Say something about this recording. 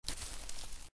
A footstep (right foot) on a dry grassy surface. Originally recorded these for a University project, but thought they could be of some use to someone.